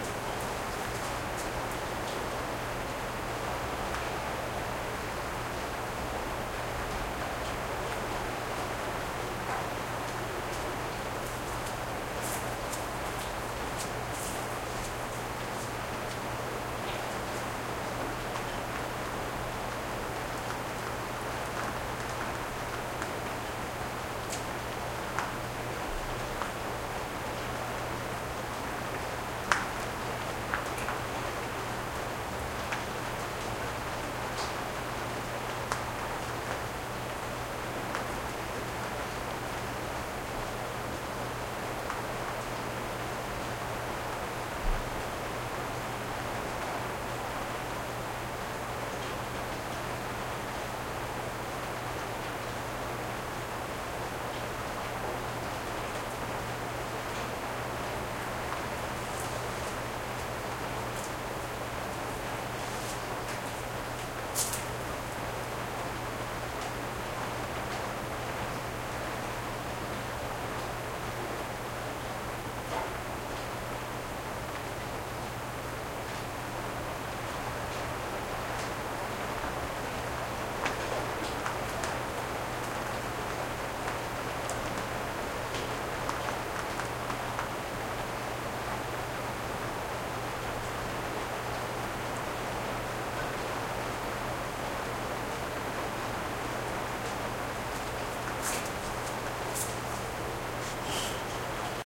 RAIN INT GARAGE 5-22-2013
Moderately heavy late night rainstorm recorded 22 May 2013 inside my garage in Beaverton, Oregon. Character is muted outside rainfall and roof with occasionall close-field sounds of dripping and impacting raindrops on door. Intensity of rain ebbs and flows throughout clip.
Recorded with Canon T4i DSLR & PolarPro stereo mic. Track stripped out with Quicktime Pro.
ambient, field-recording, interior, muted, rain